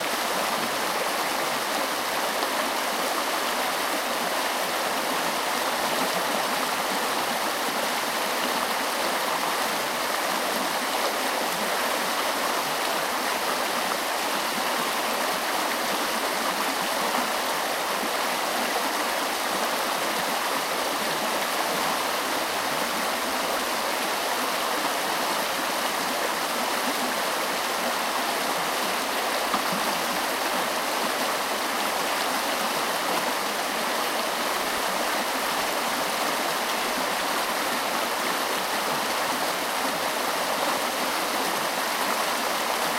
Streem, creek, close (1 of 3)
flowing, creek, river, streem, water, liquid, nature, flow